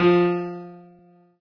Piano ff 033